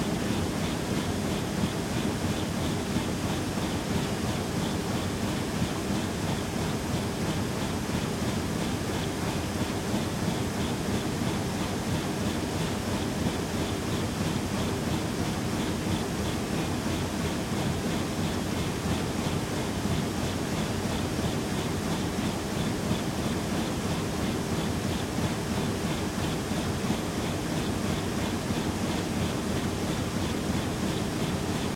cardboard factory machine-006
some noisy mechanical recordings made in a carboard factory. NTG3 into a SoundDevices 332 to a microtrack2.
engine, factory, industrial, loop, machine, machinery, mechanical, motor, robot